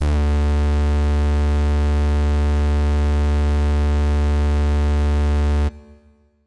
Full Brass D2
The note D in octave 2. An FM synth brass patch created in AudioSauna.
brass, synth, fm-synth, synthesizer